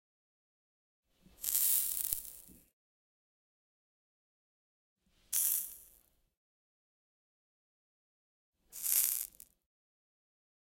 Extinguishing a candle.